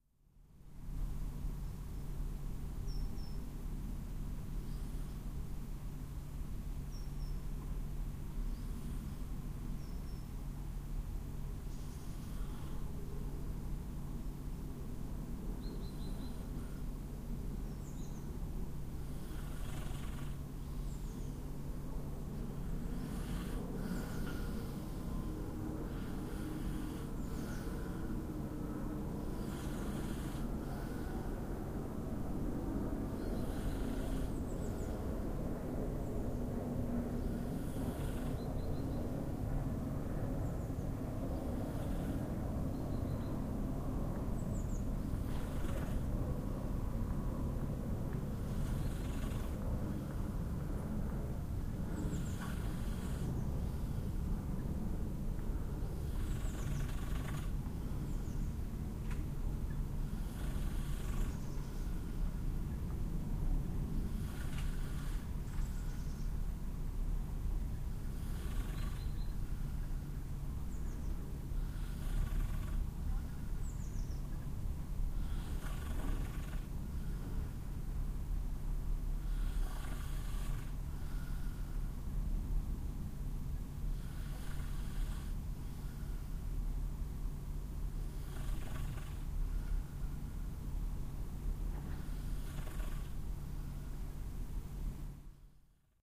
It starts with a bird singing followed by the increasing noise of an airplane that left Amsterdam Airport Schiphol a short while ago. As the planes noise is vanishing a neighbour is coming home climbing up the stairs to the front door, taking the keys out of his or her pocket, opening and closing the front door. I am asleep what you can hear as well. I switched on my Edirol-R09 when I went to bed. The other sound is the usual urban noise at night or early in the morning and the continuously pumping waterpumps in the pumping station next to my house.
airplane, bed, bird, breath, engine, field-recording, human, street, street-noise, traffic